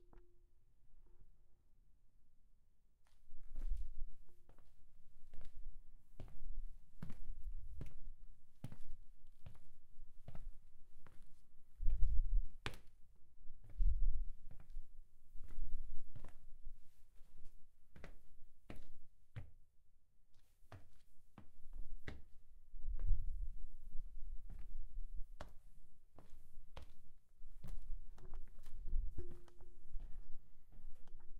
Footsteps - quiet
feet, footstep, footsteps, quiet, shoes, sneaking, sneaky, step, steps, walk, walking